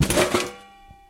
Crash of metal objects
chaotic, clatter, crash, objects